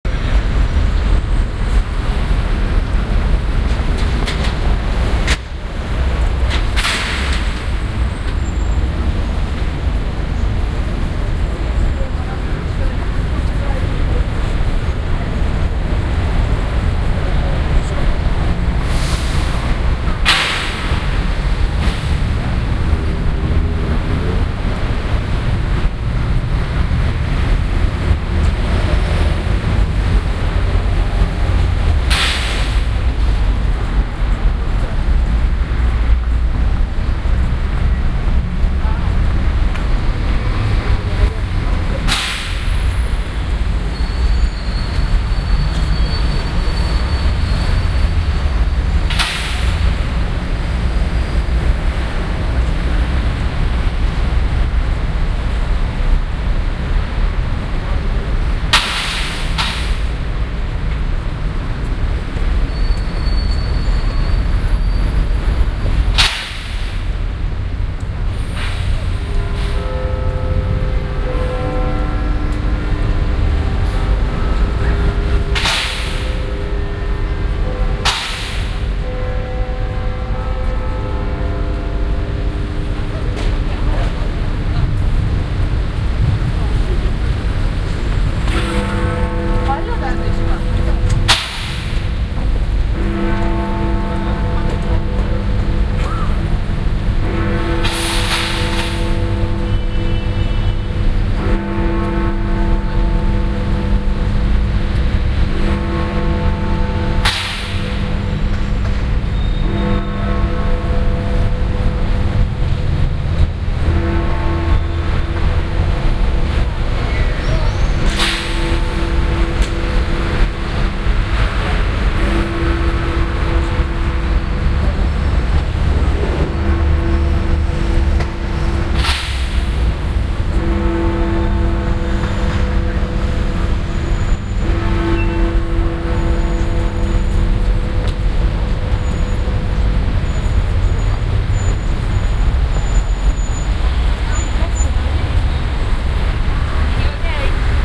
Westminster - Big Ben
ambiance, ambience, ambient, atmosphere, background-sound, city, field-recording, general-noise, london, soundscape